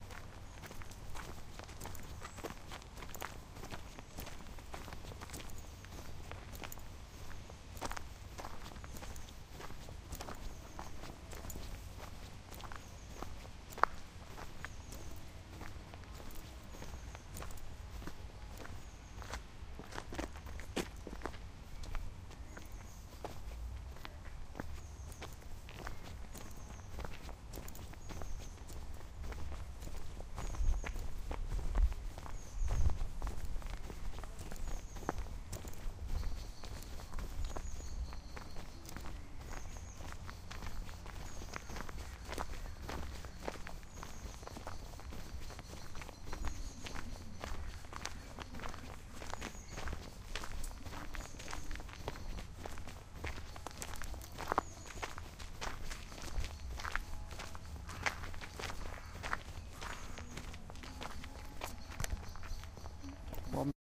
Walking Through Woodland - Heaton Park, Manchester. April 2011